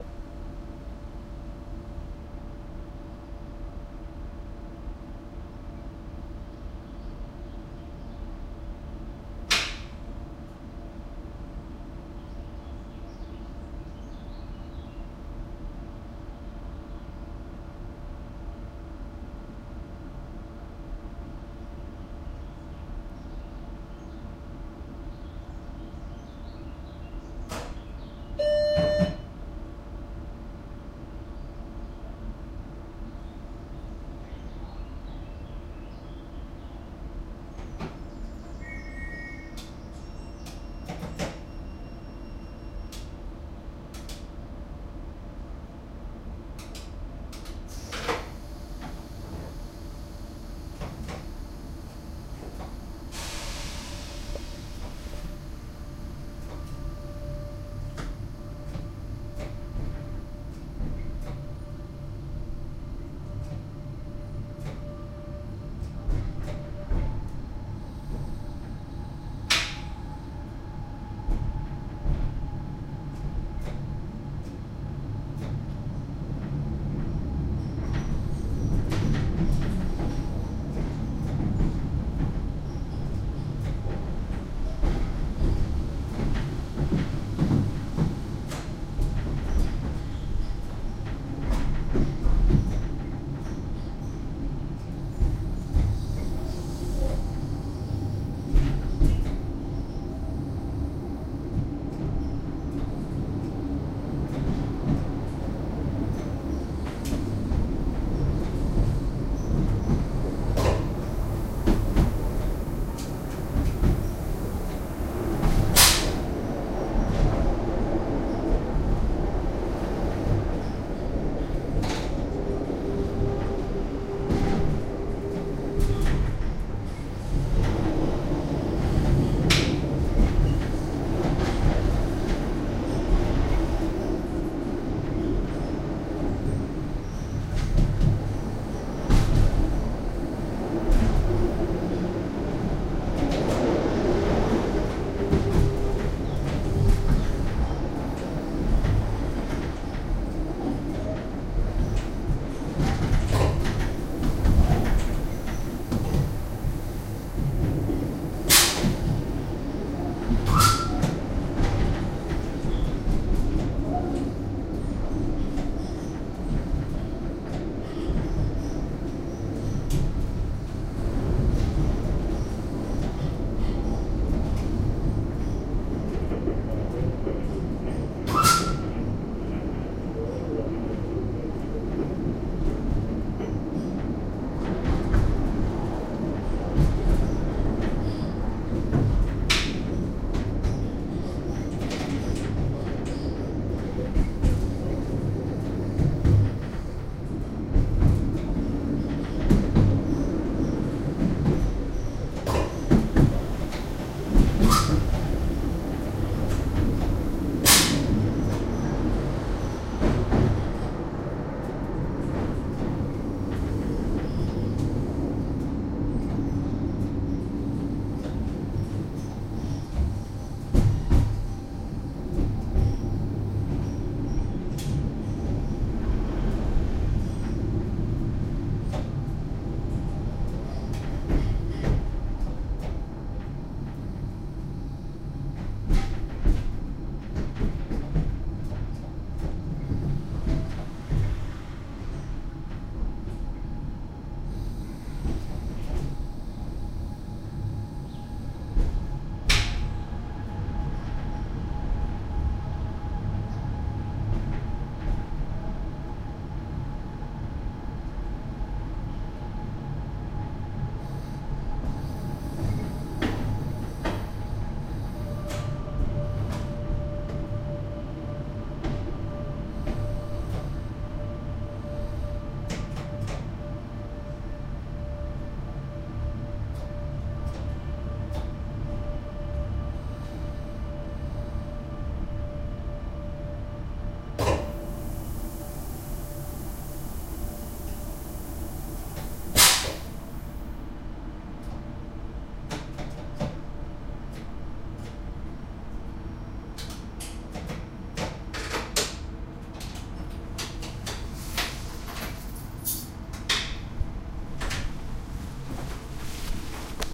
14 cremallera trajecte
that's a beautiful one, the cremallera of núria (a little train that climbs the Pyrenees mountains :
mainly train noises + radio talking + distant birds. there was only me and the driver inside the train.
ambiance, atmo, atmos, atmosphere, background, background-noise, boarding, bounce, clang, clatter, cremallera, electric, electrical, electric-train, express, field-recording, iron, junction, knock, local, locomotive, metal, noise, noises, passenger-train, pond, rail, rail-road, rail-way, railway